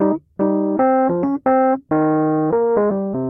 Bouncy little tune played on a 1977 Rhodes MK1 recorded direct into Focusrite interface. Loopable at ~74BPM